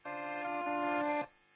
DL4 Guitar Riff
This is a small guitar riff, sampled with the loop function on a line 6 DL4 pedal, then pitch shifted and reversed with the same tool. Recorded straight on the DAW with a SM57.